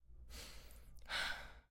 sad woman sighing
sigh, Espression, women, melancholy